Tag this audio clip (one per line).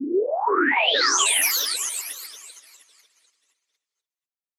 filter
straight-forward
abstract
sci-fi
simple
cosmic
space
fx
sweep
delay
effect
cliche
sound
eq
design